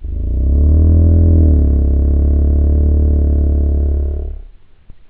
SM58 VOCBASS 1
Nice Tone Testing My New Mic.
1, bass, beatbox, creative, dubstep, mouth, note, sample, shure, sm58, two, vocal